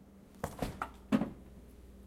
fridge opening
A fridge door opening.
fridge, opening, refrigerator